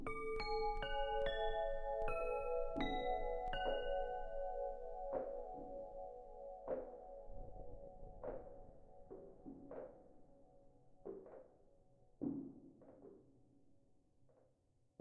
ring tone
lowercase minimalism quiet sounds
lowercase, minimalism